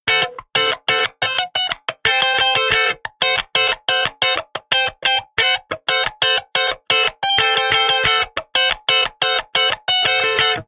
Funky Electric Guitar Sample 12 - 90 BPM
Recorded using a Gibson Les Paul with P90 pickups into Ableton with minor processing.
electric, funk, guitar, rock, sample